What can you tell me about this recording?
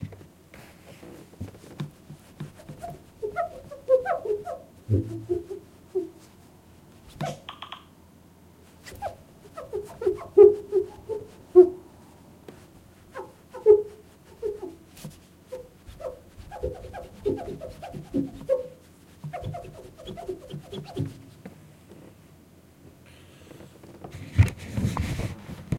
Wiping a window with a cloth